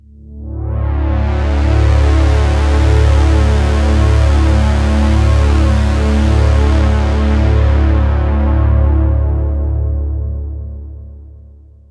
c#aflat-PulseLong
Pulse made with Roland Juno-60 Synthesizer
Effect, Sci-fi